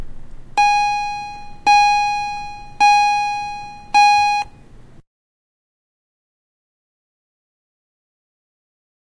recording of a school bell
bell, school, school-bell